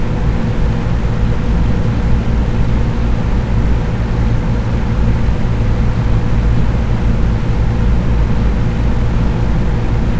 Box Fan
Microphone was near center of outside of motor. Recording captures drone of motor as well as drone of blades. Recorded with an M-Audio Microtrak II.